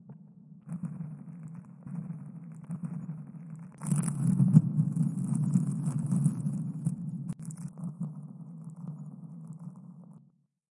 Sound of a PB&J; sandwich being taken out of a bag re-mixed to sound like a parachute opening during skydiving.
air, parachute, remix